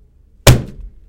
Punch Foley 2
Fight, Foley, Punch